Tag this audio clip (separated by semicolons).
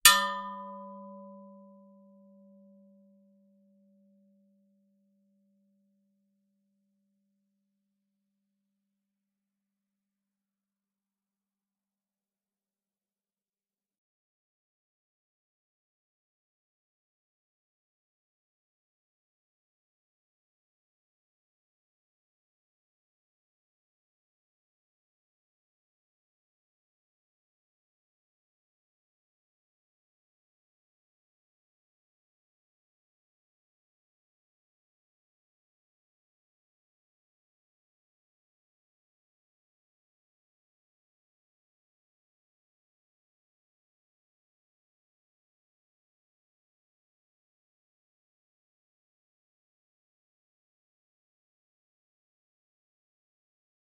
metallic; sustain; long; hit; strike; metal; wheel; impact